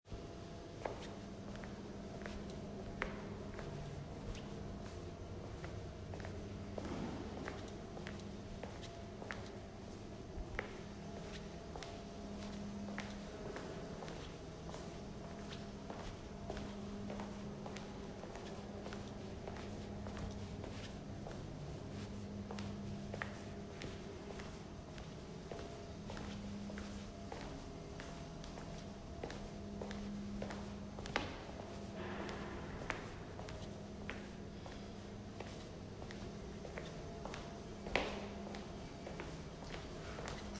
Walking in a museum, concrete floor